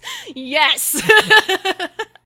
yes laugh
voice, laugh, yes